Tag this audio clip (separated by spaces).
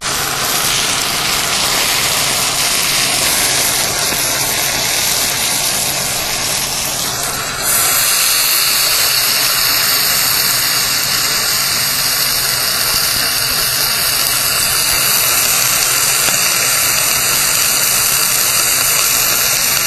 grill sear cook sizzle fry